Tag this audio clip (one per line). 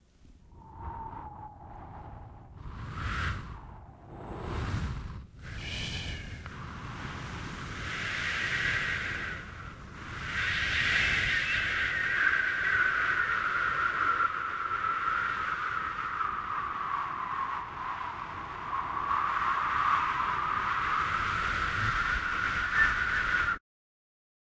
Breeze
Arctic
Cold
Windy
Storm
Wind